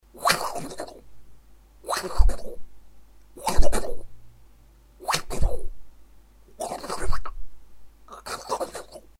A collection of cartoon-like tounge whipping sounds.